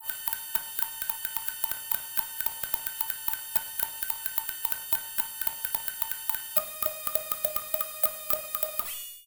Highpassed saw wave with blips. Made on an Alesis Micron.
alesis, blips, micron, synthesizer